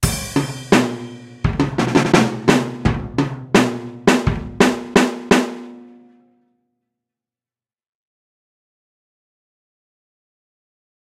85bpm - 1 drums
This drums sample will most likely accommodate any chord progression at 85bpm.
85-bpm, Drums